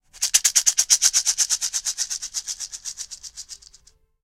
NATIVE RATTLE 02
A native north-American rattle such as those used for ceremonial purposes.
aboriginal, hand, native, rattle, first-nations, percussion, indigenous, north-american, indian, ethnic